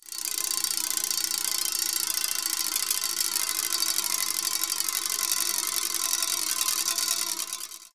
Spokes from a motobacane bicycle. Treated just enough to create the beginning of a a wave effect.